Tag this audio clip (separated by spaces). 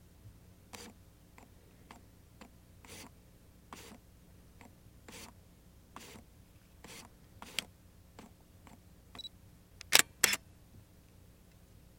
photo click shutter camera